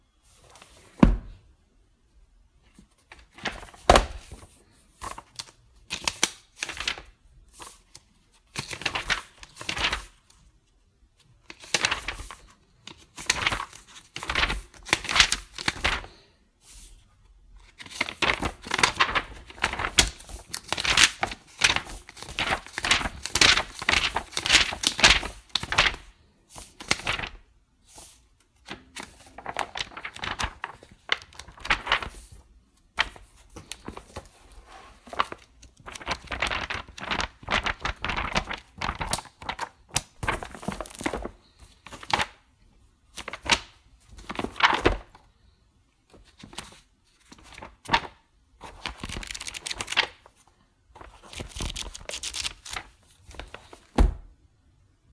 Page Turning
Turning pages in a largish book (dictionary); slow turns, quick turns, flipping through the pages, etc.
Recorded on an iPhone 6s; minimal noise-reduction applied (in Adobe Audition).